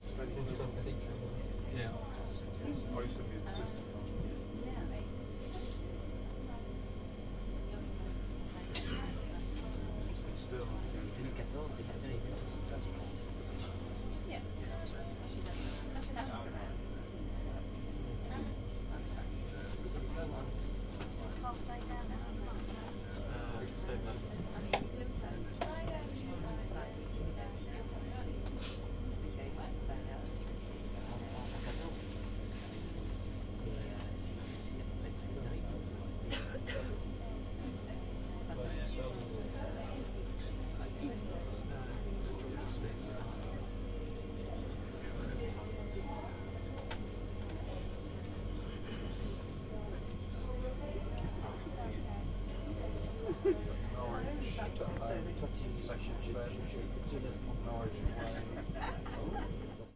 Recording of a UK train journey with lots of passenger talking whilst the train is still.
Equipment used: Zoom H4 internal mics
Location: About Letchworth Garden City
Date: 19 June 2015